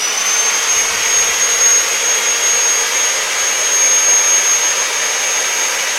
Basic saw sounds. Based off of